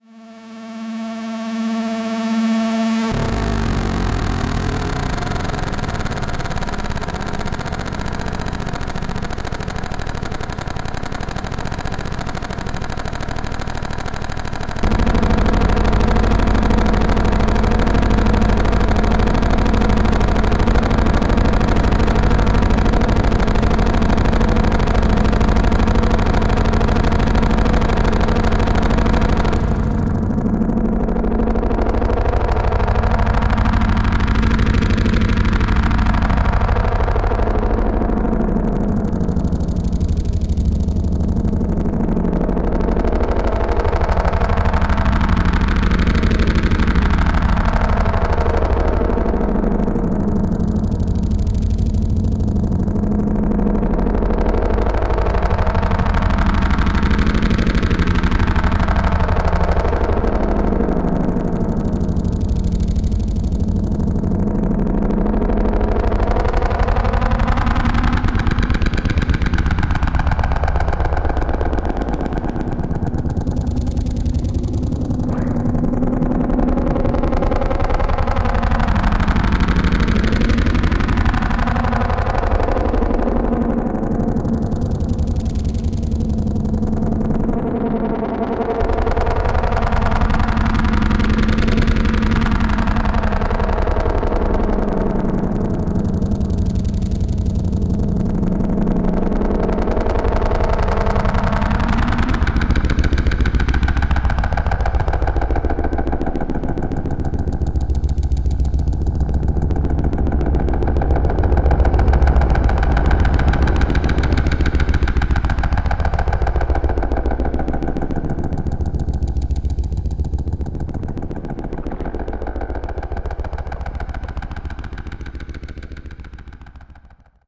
alien scouts001
Electronic loops and noise for your next science fiction masterpiece.